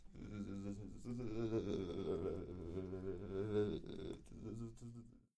Murmullos frio
cold, freezing